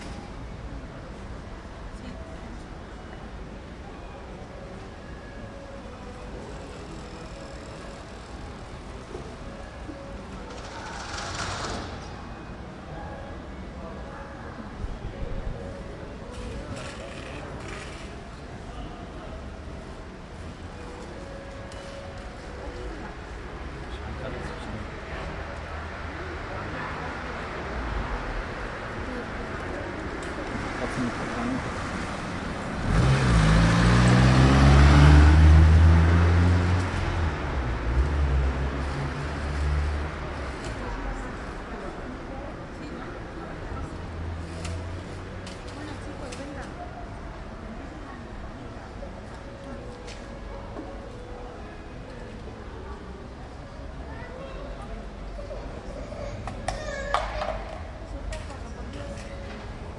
Residential neighborhood - Stereo Ambience
A residential neighborhood, distant street cafe, lazy street, summer in the city
ambiance, ambience, ambient, atmo, atmos, atmosphere, background, background-sound, field-recording, stereo